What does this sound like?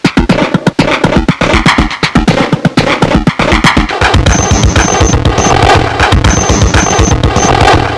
Roland Exceptions
ambient, bent, circuit, drums, roland